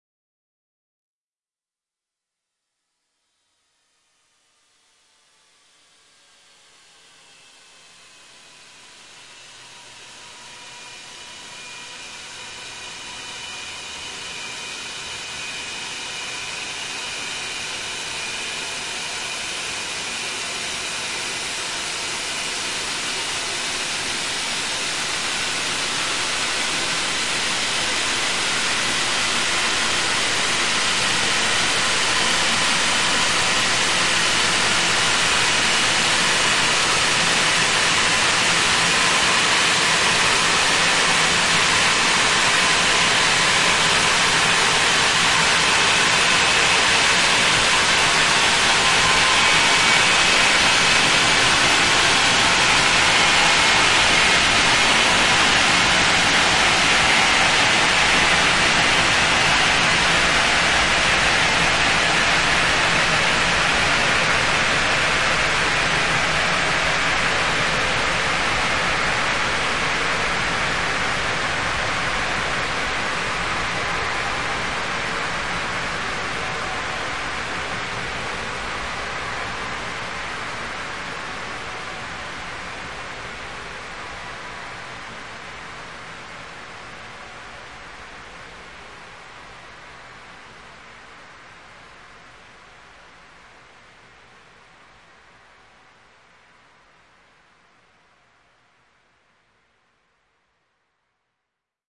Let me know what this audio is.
sample to the psychedelic and experimental music.
AmbientPsychedelic, ExperimentalDark, Noise